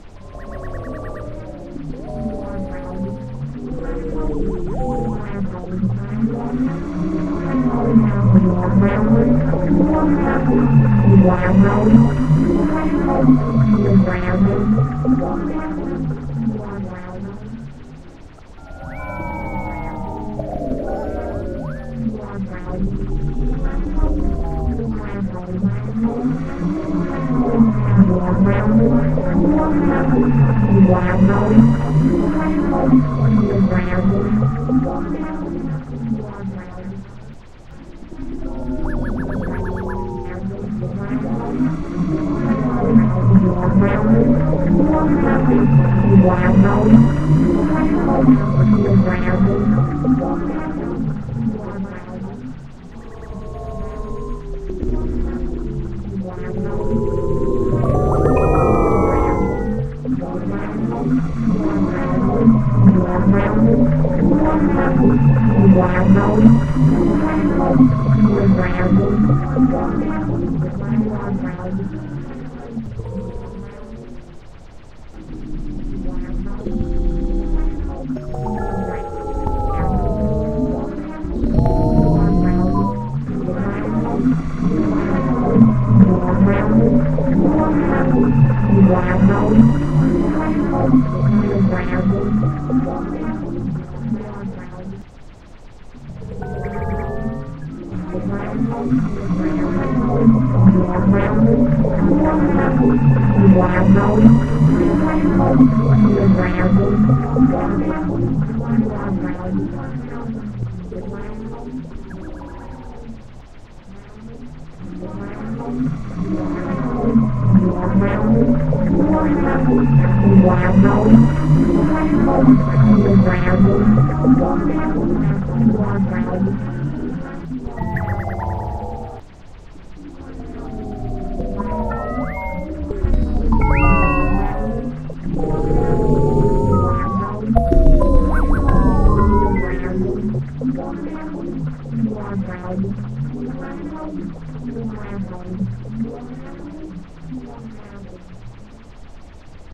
ship engine 1
spaceship/ufo engine sound created using tones generated and modified in Audacity
alien, aliens, engine, science, sci-fi, scifi, ship, space, spaceship, ufo